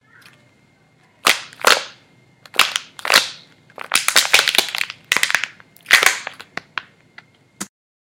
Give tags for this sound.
cup scrunch plastic plasticcup

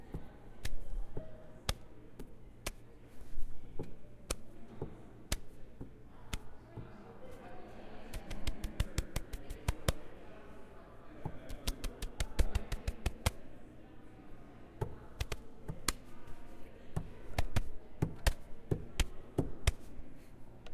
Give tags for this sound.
light smack smacks

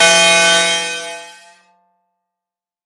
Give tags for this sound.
electronic bounce noise trance techno lead electro glitch-hop sound hardcore processed effect synth sci-fi synthesizer rave random porn-core blip dark bpm resonance 110 house dance acid glitch club

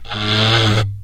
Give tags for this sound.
idiophone wood

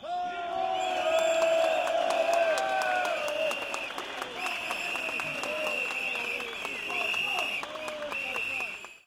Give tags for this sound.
whistle labour demonstration

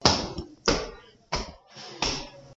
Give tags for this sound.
On Someone Stairs Walking

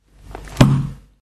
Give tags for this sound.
lofi percussive noise paper book loop household

